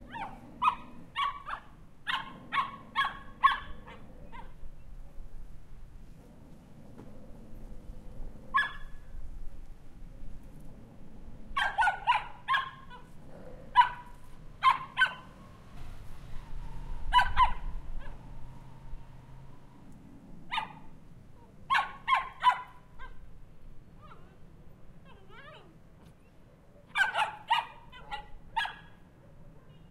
Small dog barking
| - Description - |
Distant bark of a small dog
| - Recorded with - |
angry, Animal, bark, dog, Growl, little